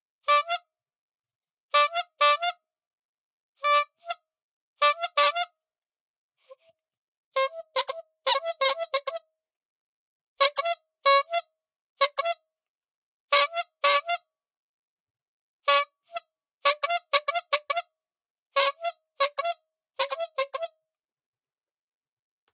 Small squeeze bulb horn. Different voicings. Recorded using MOTU Traveler and AT 2035.
Bulb, Horn, Old, Rubber, Squeeze